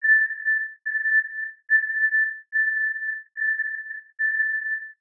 A few octaves in A of a sound created with an image synth program called coagula.
multisample, space, synth